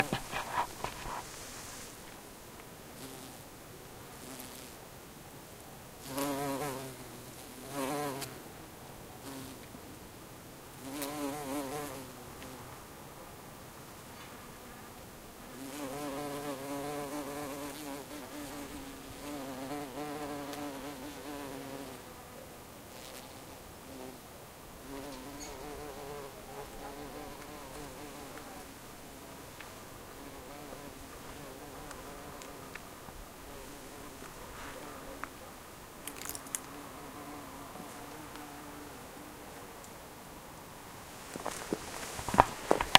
Recording of a single bumblebee outside in a bush.